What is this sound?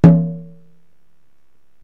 mid rack tom funk
one of my middle toms with half muffle.